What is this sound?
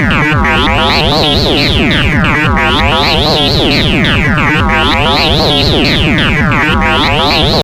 quantum radio snap063
Experimental QM synthesis resulting sound.
noise, soundeffect, experimental, drone, sci-fi